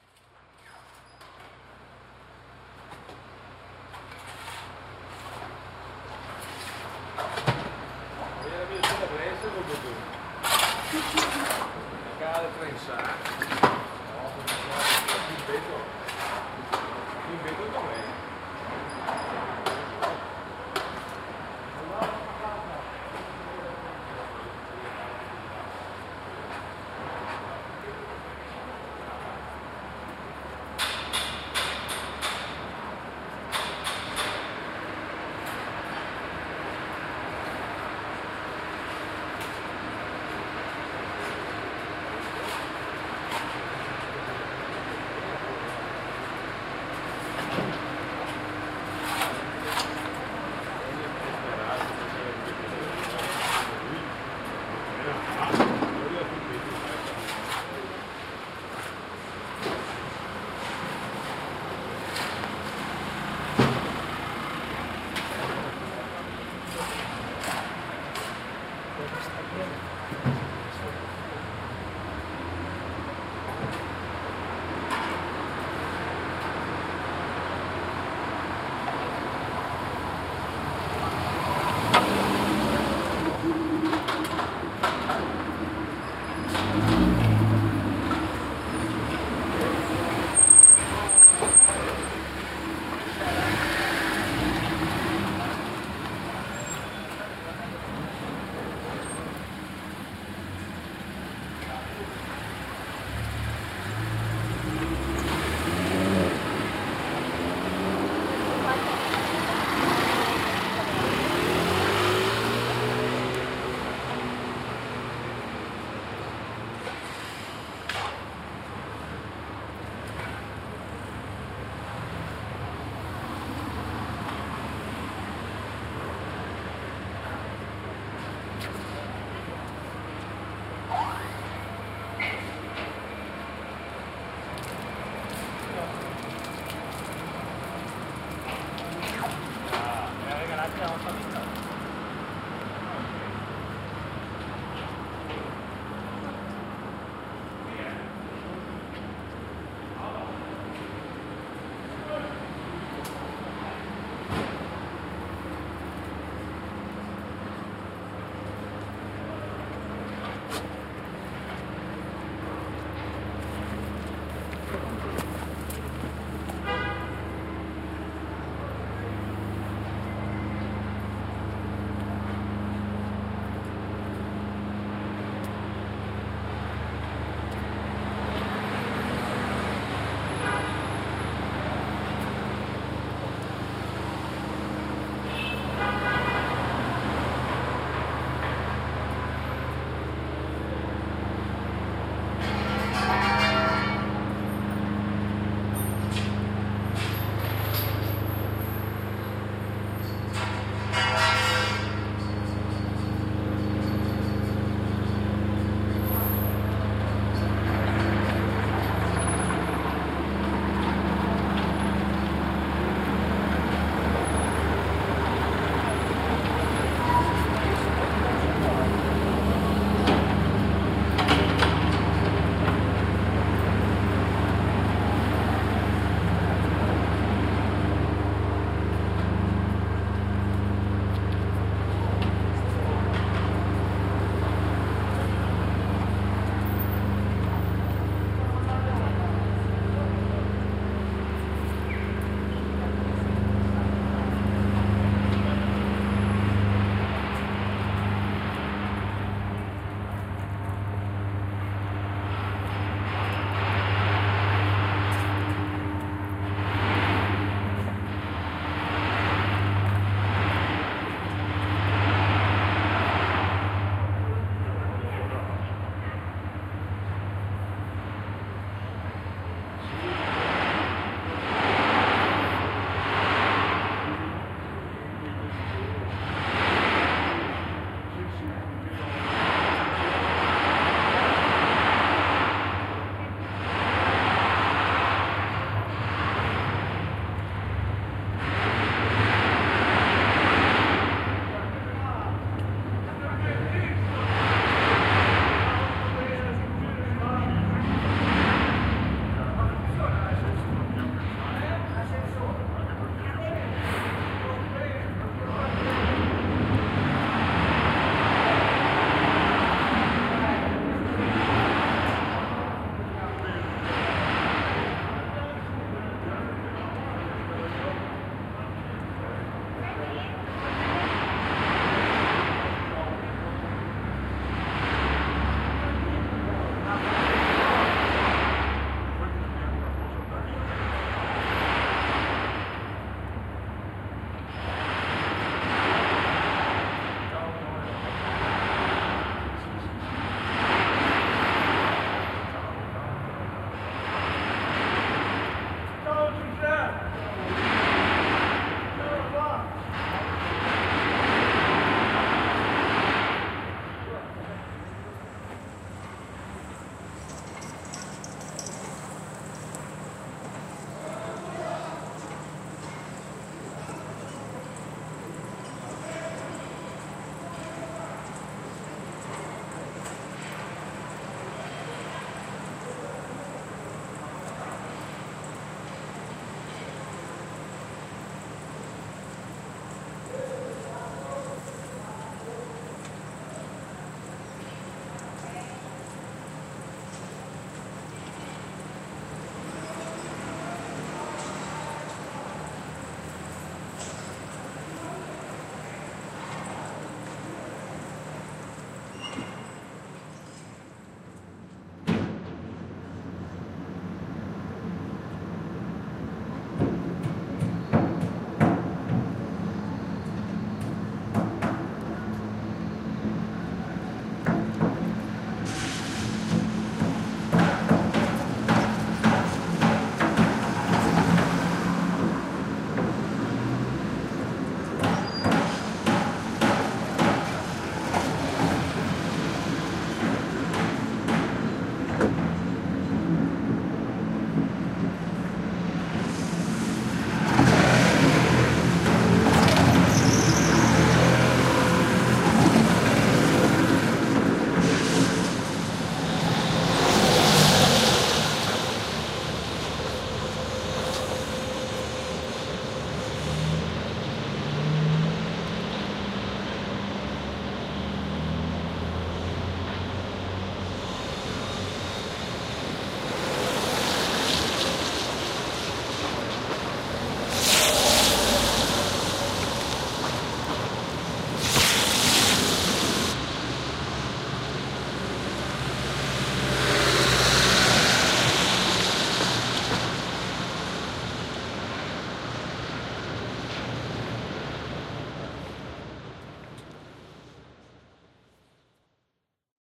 carnevali sound walk
Soundwalk. part of the field recording workshop "Movimenti di immagini acustiche". Milan - October 29-30 2010 - O'.
Participants have been encouraged to pay attention to the huge acoustic changes in the environment of the Milan neighborhood Isola. Due to the project "Città della moda" the old Garibaldi-Repubblica area in Milan has turned into a huge bulding site.
isola, massobrio, milan, orsi, soundwalk